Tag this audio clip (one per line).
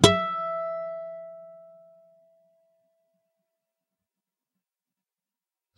single-notes
nylon-guitar
guitar
acoustic